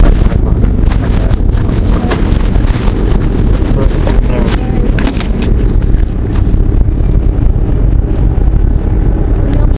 raw dyingbattery
Digital recorder losing power.
death, digital